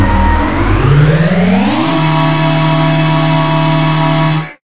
Created with Granulab from a vocal sound. Less pusling added random panning. Lower frequency rising pitch.